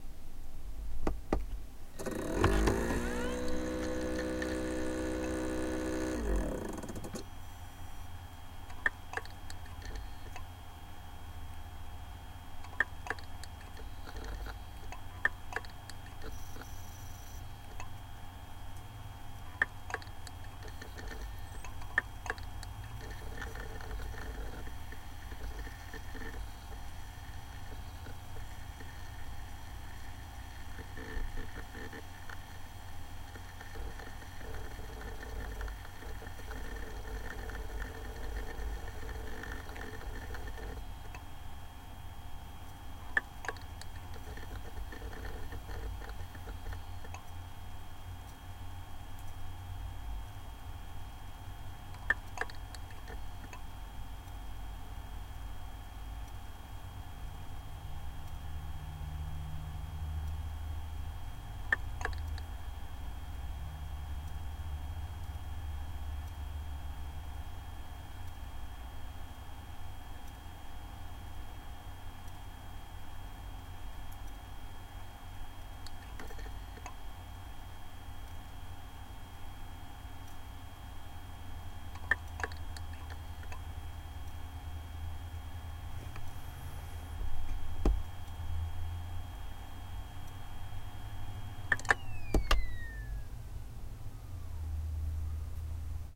All those sounds are actually made by an Acer Aspire 3000.